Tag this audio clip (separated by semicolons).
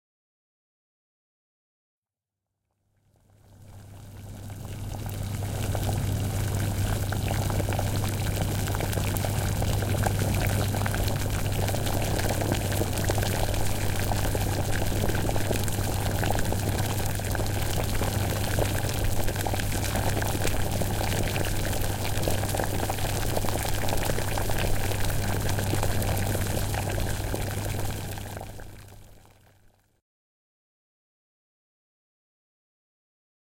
boiling; CZ; Panska; water